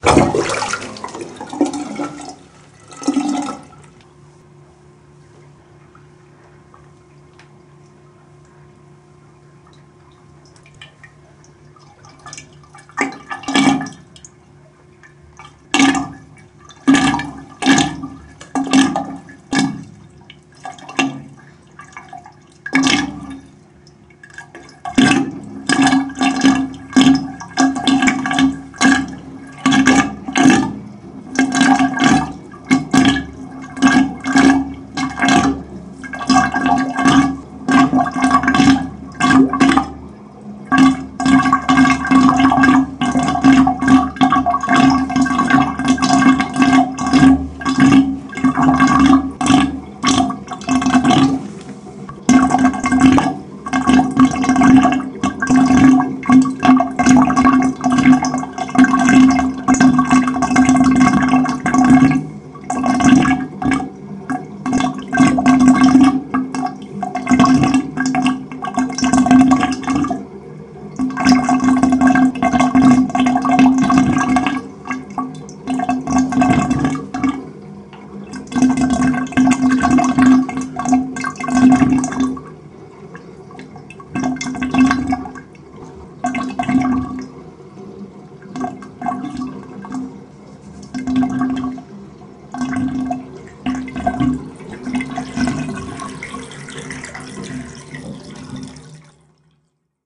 Bathtub-Drain
Stereo recording of me filling up the bathtub completely full. During the draining process, my drain/plughole makes loud "glugging" sounds, which starts around 12 seconds. A the ending, the water quietly swirls in a counter-clockwise motion into the drain/plughole.